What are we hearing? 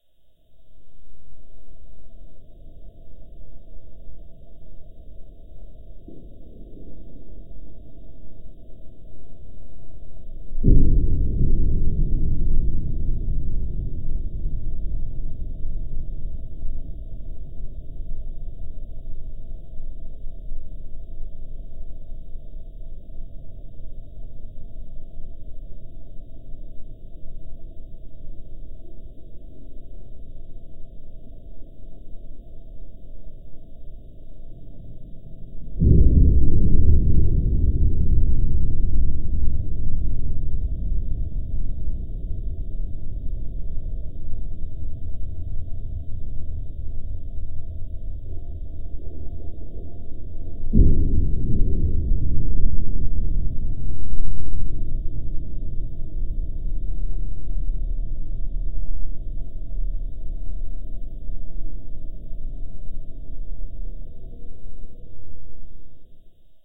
Check out candyapple's a.k.a. Ladybug's game " To: You " HERE!!
For best experience, make sure you:
* Don't look at the sound waves (the sound display) at all!
* Is in a pitch-black, closed room.
* For ultra feeling, turn up the volume to 100% and set the bass to maximum if you have good speakers! Otherwise put on headphones with volume 100% (which should be high but normal gaming volume).
* Immerse yourself.
Space Atmosphere 01 Remastered
This sound can for example be used in action role-playing open world games, for example if the player is wandering in a wasteland at night - you name it!
If you enjoyed the sound, please STAR, COMMENT, SPREAD THE WORD!🗣 It really helps!
More content Otw!
space, atmosphere, ambiance, mystery, movie, mysterious, universe, creepy, ambient, cosmos, ambience, game